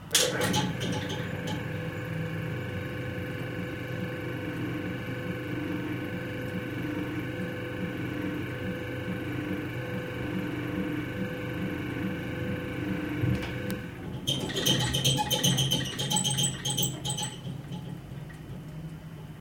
Old soviet refrigerator "Бирюса" ("Birjusa") starts and rumble and stops.
USSR, stop, kitchen, start, soviet, refrigerator, rumble